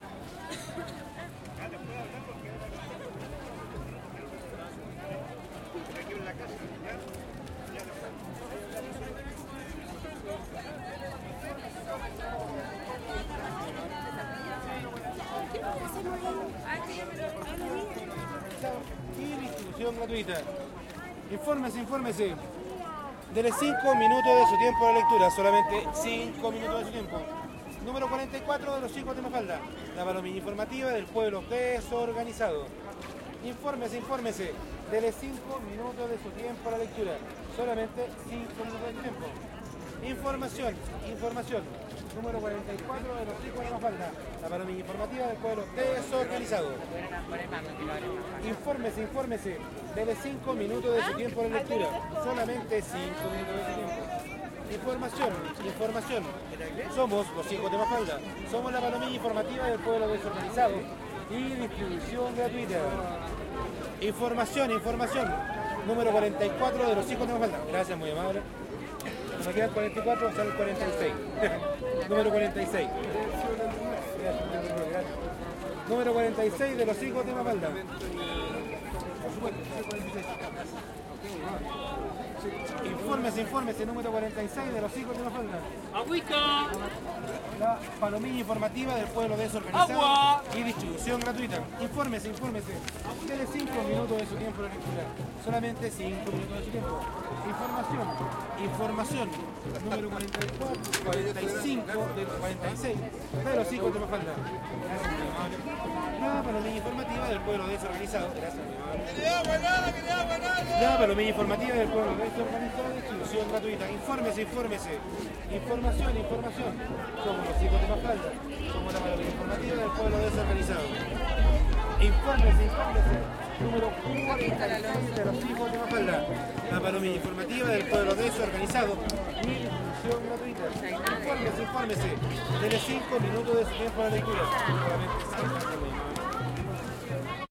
domingo familiar por la educacion 05 - los hijos de mafalda
somos los hijos de mafalda
la palomilla informativa del pueblo desorganizado
y distribucion gratuita